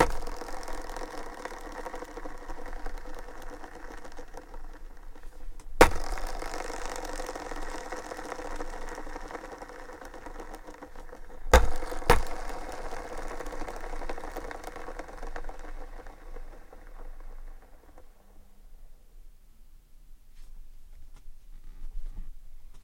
Spinning wheels on a skateboard